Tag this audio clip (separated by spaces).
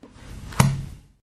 lofi book percussive paper loop noise household